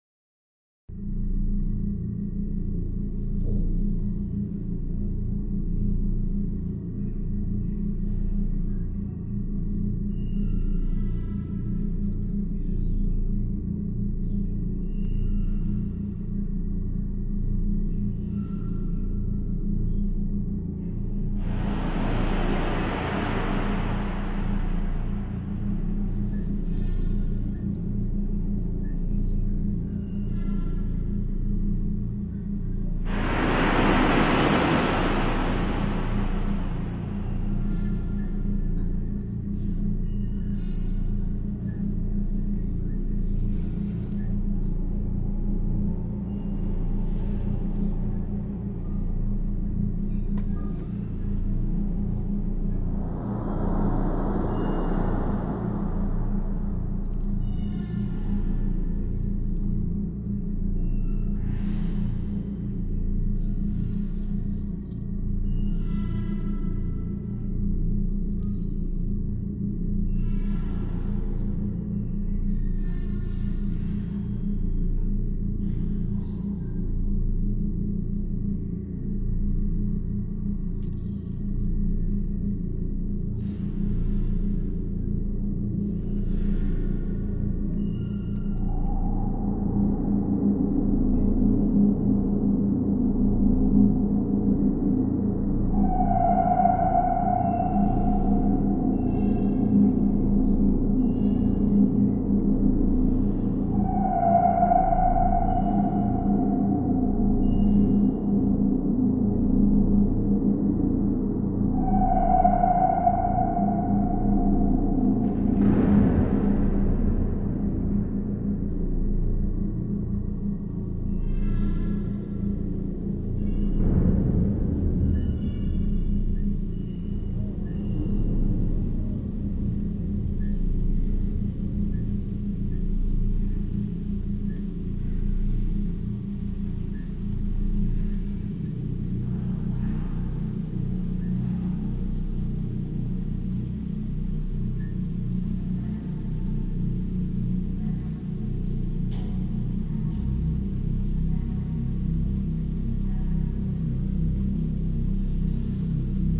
scifi ruined environment
A mix of sounds, originally created for post-apocalyptic sci-fi environment.
atmo, terrifying, weird, background-sound, sinister, drone, sci-fi, ambient, terror, thrill, broken, drama, post-apocalyptic, ruined, atmosphere, futuristic, haunted, noise, rain, beep, phantom, utopic, indoor, thunder, ambience, robot